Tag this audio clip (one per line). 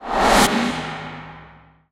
abstract
air
artificial
layer
layered
processed
remix
SFX
swoosh
wind